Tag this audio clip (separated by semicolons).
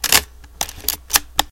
camera; image; picture; snap